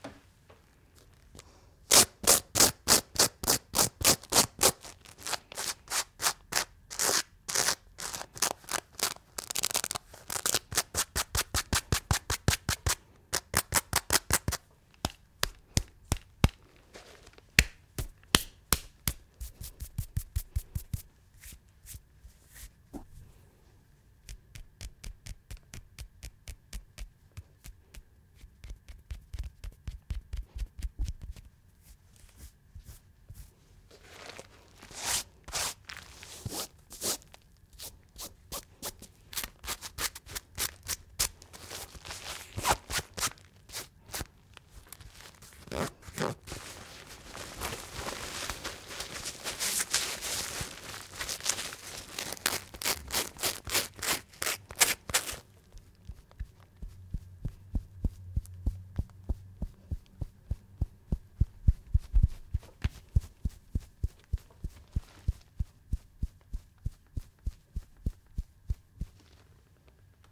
Sound for tear apart the clothes
apart, broke, clothes, field, record, recording, scratch, tear, vetement